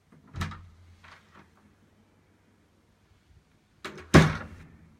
Sonido de una puerta al abrirse y cerrarse es una puerta de madera con un lijero blindaje.El entorno era un pasillo pequeño.Grabado con el movil .